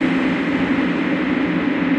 convoluted bare bone loop 60 bpm 259
This is loop 135 in a series of 135 loops that belong together. They all have a deep dubspace feel in 1 bar 4/4 at 60 bpm and belong to the "Convoloops pack 02 - bare bone dubspace 60 bpm" sample pack. They all have the same name: "convoluted bare bone loop 60 bpm"
with three numbers as suffix. The first of the three numbers indicates
a group of samples with a similar sound and feel. The most rhythmic
ones are these with 1 till 4 as last number in the suffix and these
with 5 till 8 are more effects. Finally number 9 as the last number in
the suffix is the start of the delay and/or reverb
tail of the previous loop. The second number separates variations in
pitch of the initial loop before any processing is applied. Of these
variations number 5 is more granular & experimental. All loops were
created using the microtonik VSTi.
I took the bare bones preset and convoluted it with some variations of
itself. After this process I added some more convolution with another
60-bpm, dub, deep, dubspace, space